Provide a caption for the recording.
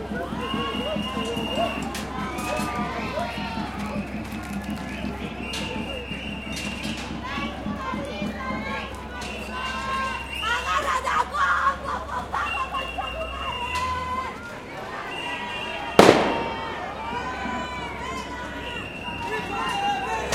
Protests in Chile 2019. The police arrive and the protesters calm down 02
Protests in Chile 2019. The police arrive and the protesters calm down, then face each other slightly 02
2019, arrive, Chile, down, face, other, police, protesters, slightly, The, then